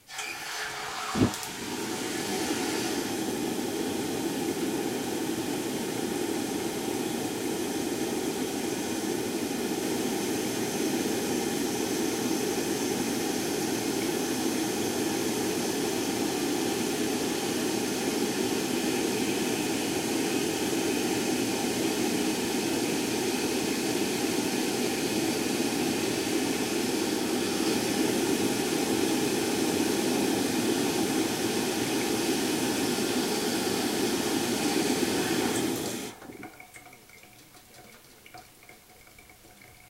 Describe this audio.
A mono recording of a tankless water heater burning.
Sounds like a combination of high and low pitched noise.
At the end you hear water running into the sink.
water-heater, noise, gas, burning